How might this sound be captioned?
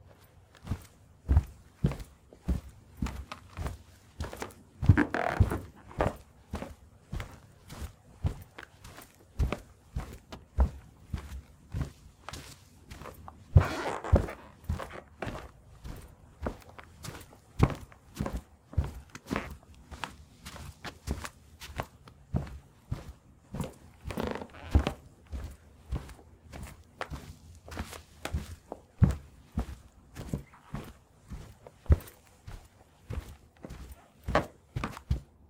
FOLEY Footsteps Carpet 001
I recorded myself walking around the outer waiting area at my office. There are some very nice floorboard squeaks in here. Unfortunately, the environment isn't as quiet as I'd like; you can discern some traffic noise coming from outside the office building.
Recorded with: Sanken CS-1e, Fostex FR2Le
floorboard, footstep, squeak, creak, Carpet, shoes, rug, steps, walking, boots, foley